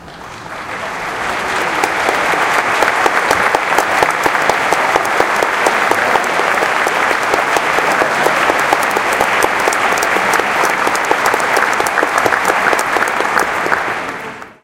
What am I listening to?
This recording was taken during a performance at the Colorado Symphony on January 28th (2017). Recorded with a black Sony IC voice recorder.
applauding, applause, appreciation, audience, auditorium, clap, clapping, concert-hall, crowd, group, people, polite